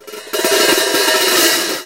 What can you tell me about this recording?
Hi-Hats noise. Recorded with Edirol R-1 & Sennheiser ME66.
hi-hats, hihat, hihats, noise, hi-hat